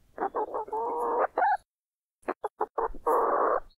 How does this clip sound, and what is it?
Chicken Cluck Soft
A chicken softly clucking as it inspects the audio recorder.
chicken, cluck, clucking, farm, hen